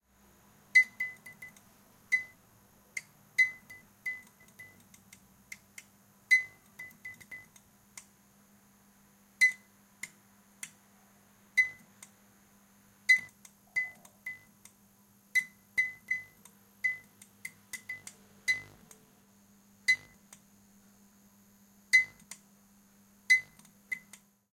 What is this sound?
Bad Fluorescent lamp clicks recorded in my bathroom with iPhone+Tascam iM2 microphone
light, broken, sfx, lamp, bulb, crackle, clicks, electricity